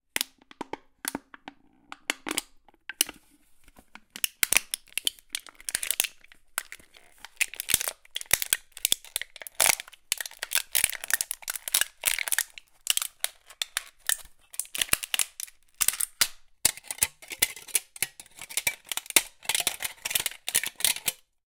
Miked at 3-4" distance.
Crumpling and twisting a metal pop can.